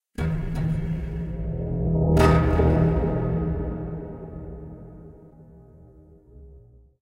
Metal and oxide texture.
texture of metal.